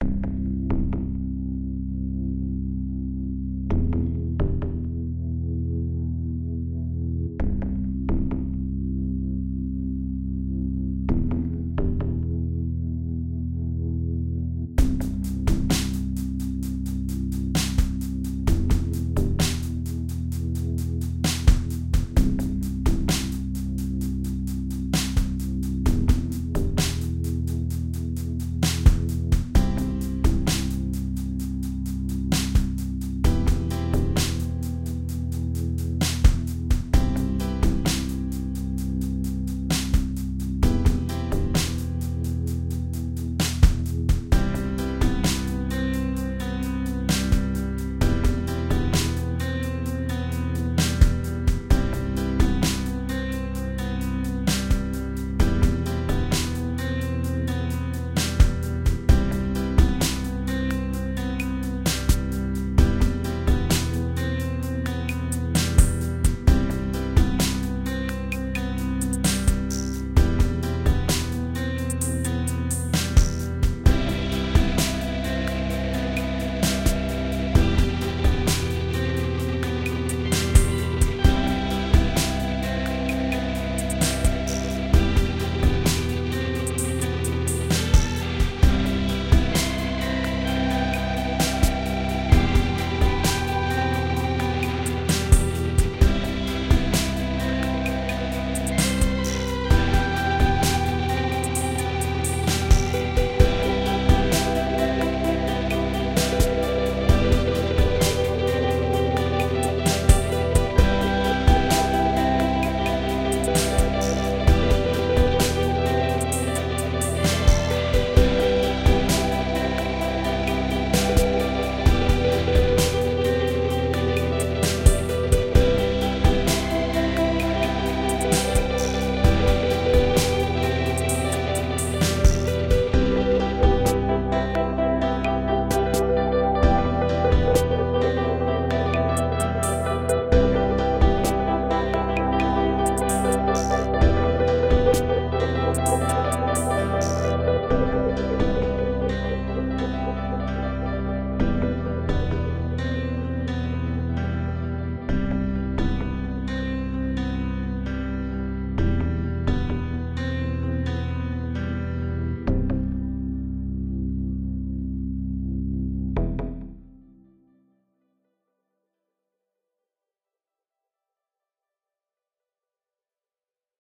Float and Fly
Cool kick-back slow rock-sounding instrumental. Technology feel. Great for podcasts or documentaries about science or technology. News also. Hope you like, enjoy!
atmosphere, atmospheric, back, documentary, instrumental, journalism, kick, nerdy, news, piano, podcast, podcasts, rock, science, slow, song, technology, theme